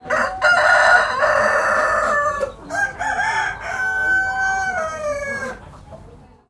Two cockerls crowing; one answers the other. In show tent
Early, Crow, Cockerel, Crowing, Wake-up, Morning